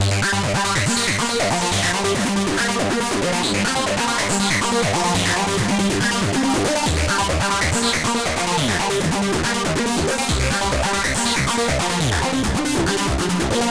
Chaser RMX
140-bpm, bass, beat, distorted, distortion, drum, hard, melody, phase, progression, rave, sequence, synth, techno, trance